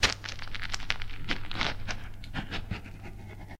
note: these samples maybe useful for horror media.
smiles to weebrian for the inspiration, the salads on me (literally)
(if this sound isn't what you're after, try another from the series)
arm, bones, break, effects, flesh, fx, horror, horror-effects, horror-fx, leg, limbs, neck, squelch, torso